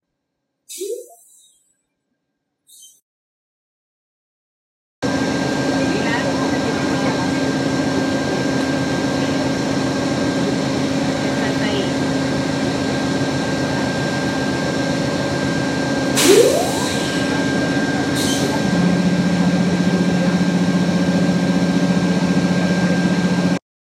Amtrak Hydraulics
Hydraulics sound I️ recorded from an Amtrak Locomotive. First part is just the hydraulic I️ edited out from the background noise. The second half is the noise with all the background elements.
heavy-machinery, hydraulic-pump-sound, train-noises